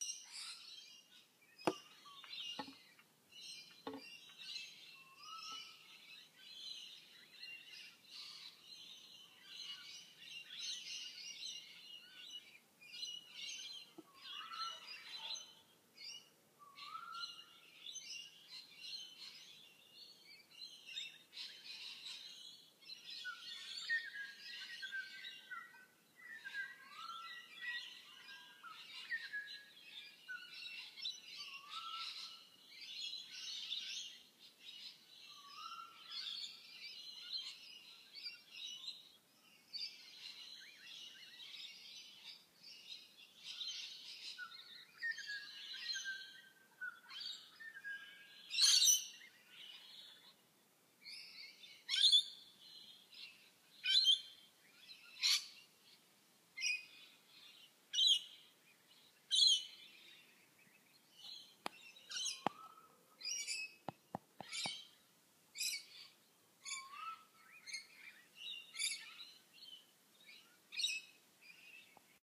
A sound of bird tweets and chirps.